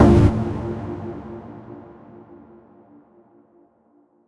Made with NI Massive